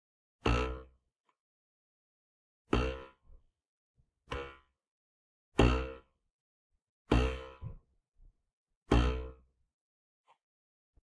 Cartoon 9'' Boing
Cartoon Boing Sound created with a ruler. The 101 Sound FX Collection
boing, cartoon, fall, falling, slide, slide-whistle, spring, sproing, toon, whistle